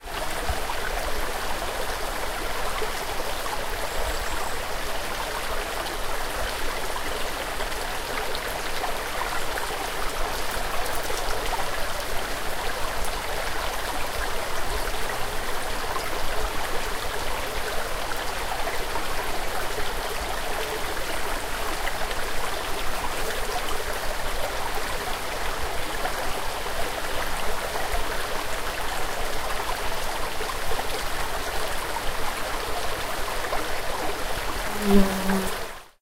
Close to a small River in the Forest - Austria - Waldviertel
You are close to a small river in deep forest of austria. Enjoy the splash :)
River, Stream, splash, Water, forest, field-recording, Waldvierel, nature, insect